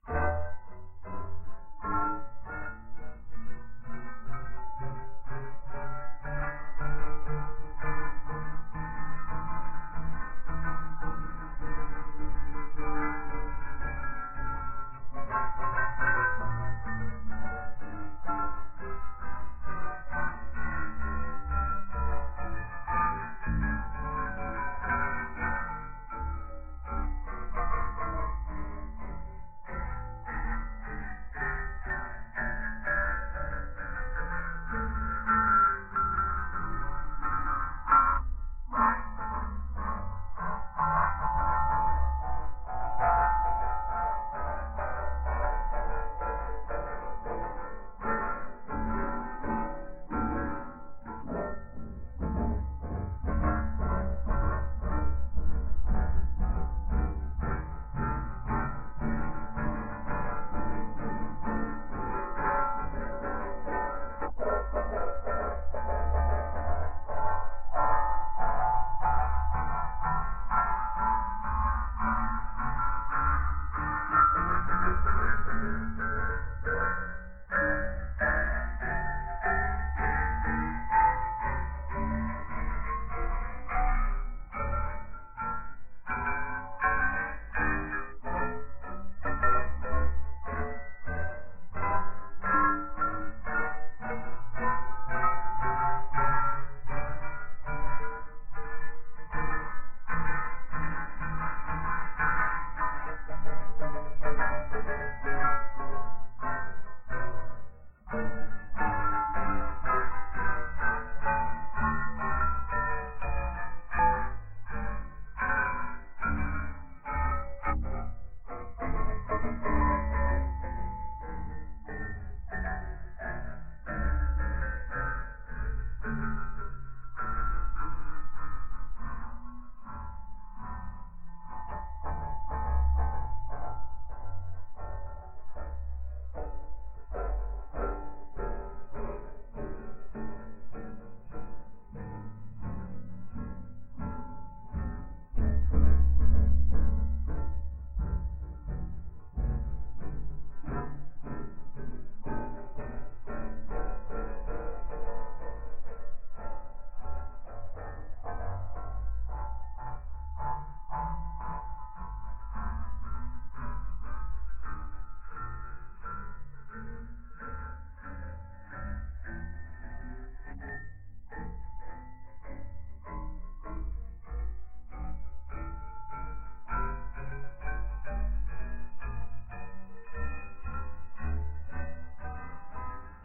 Horror Chain
This is a recording I made of a handle-less serrated knife rubbing against another of the same type, back and forth. I then cut out the lighter points to leave the heavy tones, then I gave it a little stretch and filtered it. Recorded on a Zoom H2.
horror, creepy, deep, eerie, ominous, tones, terrifying, chain, sinister, weird, background, foreground, spooky